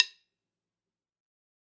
Drumsticks [Dave Weckl Evolution] open wide №2.
click, countdown, dk, iy, metronome, one, one-shot, shot, snare, wood